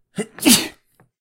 I accidentally sneezed while testing audio levels but it happened to be self-contained with the right volume for an isolated sound effect! Recorded on my new Blue Yeti Nano with noise reduction applied.
I wasn't really prepared when it happened so there might be some minor clicking/noise and I couldn't really remove it. Free for anyone to use and I'd love to know if anyone includes it in their projects!